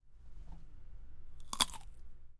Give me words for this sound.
30.Rotura Pierna 01
crack, field-recording, people